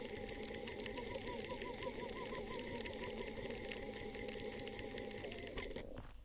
Queneau machine à coudre 20
son de machine à coudre
coudre, machinery, POWER, industrial, machine